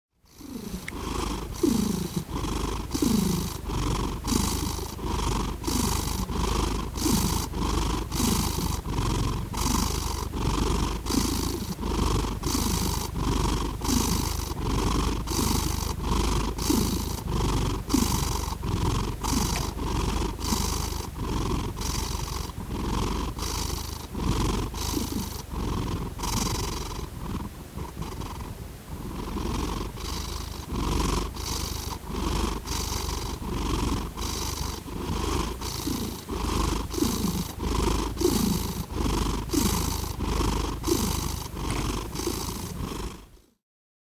Purring Cat - Schnurrende Katze
Out of my sound archive from my lovely cat Speedy (R.I.P)
Purring, Cat, Animal